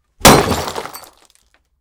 Glass Break 1
A large glass bottle shattering.
burst, shatter, glass